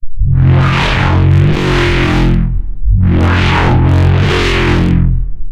A processed DNB reece type bass
Bass, DNB, Reese